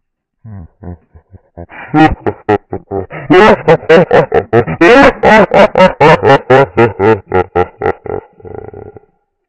Bad Laugh
This is a bad laungh
bad, bse, lache, laugh